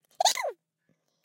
monstro feito por humano - human voice
bichao, monstro, monster, monstrao, bichinho, bicho, monstrinho